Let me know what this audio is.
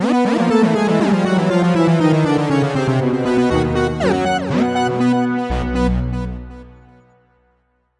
short loops 13 02 2015 4 game over 2
made in ableton live 9 lite
- vst plugins : Alchemy
you may also alter/reverse/adjust whatever in any editor
please leave the tag intact
gameloop game music loop games techno house sound
end, endgame, game, gameloop, games, house, loop, sound, techno